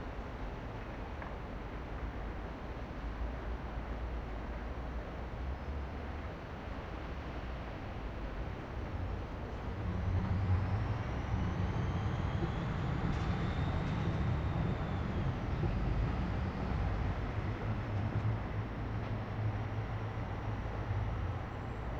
SKYLINE MS 01
This recording is don on the top flooor of clarion hotel in oslo. It is a ms recording with mkh 30 L and mkh 50 R. To this recording there is a similar recording in ms, useing bothe will creating a nice atmospher for surround ms in front and jecklin in rear.
ambience, ambient, atmosphere, city, field-recording, general-noise, soundscape